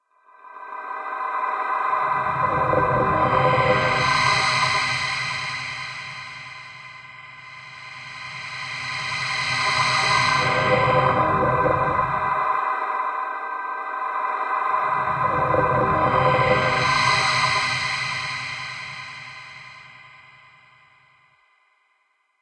fagot processed sample remix